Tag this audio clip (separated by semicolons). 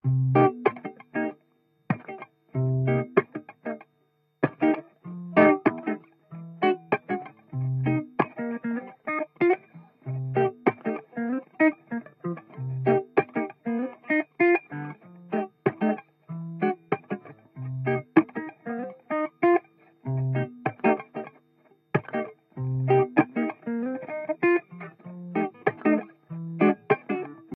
96bpm; ambient; cool; fender; funky; groovy; guitar; improvised; lofi; loop; oldtape; quantized; soul; vintage